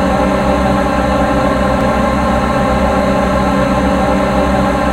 Created using spectral freezing max patch. Some may have pops and clicks or audible looping but shouldn't be hard to fix.
Atmospheric, Background, Everlasting, Freeze, Perpetual, Sound-Effect, Soundscape, Still